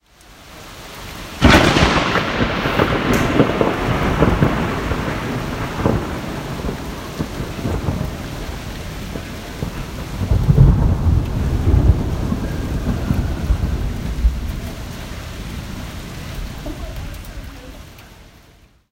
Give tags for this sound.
rain thunder thunder-storm weather

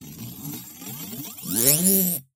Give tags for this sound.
Metal Scratch Grind Screech Sound Scuff Rub Scrape Effect Grate

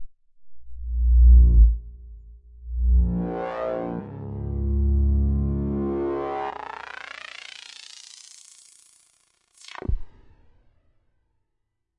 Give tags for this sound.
bass sounddesign wobble

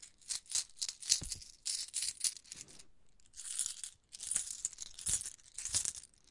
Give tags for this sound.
chain coin field-recording movement

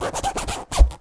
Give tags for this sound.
egoless scratch natural zipper 0 sounds noise vol

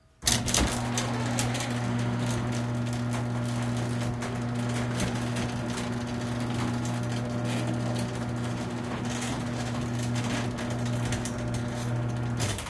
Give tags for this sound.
industrial mechanical quad machine garage-door

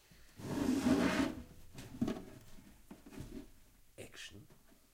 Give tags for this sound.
action voice

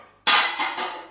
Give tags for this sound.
clashing plate

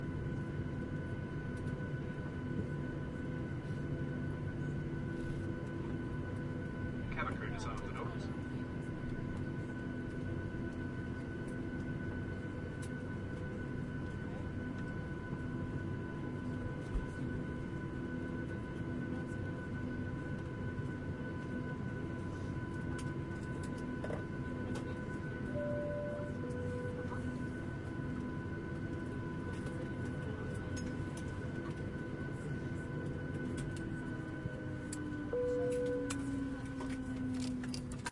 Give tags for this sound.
aircraft-sounds cabin-ambience jet-plane

